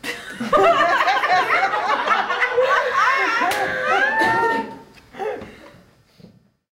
Audience Laughing48
Audience in a small revue theatre in Vienna, Austria. Recorded with consumer video camera.
laughing, clapping, group, applause, audience, cheering, applauding, crowd